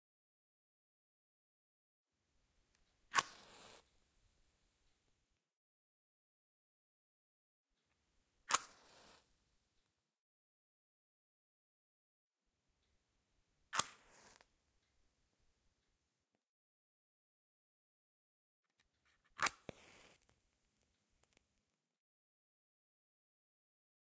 Striking the matches.
CZ, CZECH, fire, match, matches, Panska